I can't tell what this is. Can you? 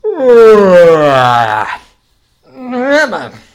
A sound you make when you wake up.